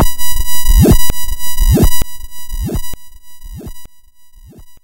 15. High buzz shares echochamber with spittly oscillation of bass.